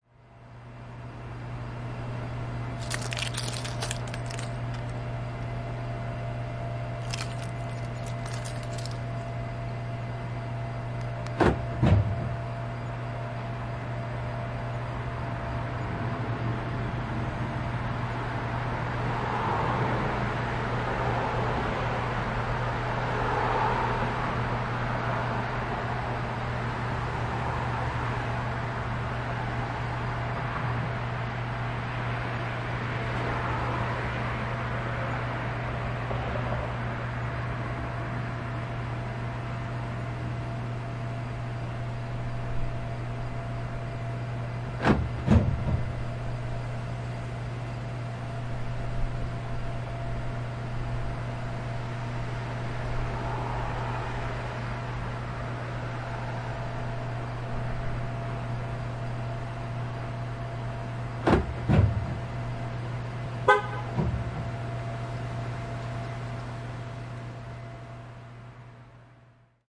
Jingling keys and using the key fob to lock and unlock a truck in a sketchy neighborhood as traffic passes. Confirmation clicks as the locks engage on the doors and tailpipe, and a honk on the double-press. There's a constant electrical hum in the background of this industrial setting.